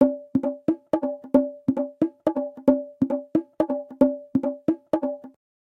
JV bongo loops for ya 3!
Closed micking, small condenser mics and transient modulator (a simple optical compressor he made) to obtain a 'congatronic' flair. Bongotronic for ya!
bongo, congatronics, loops, samples, tribal, Unorthodox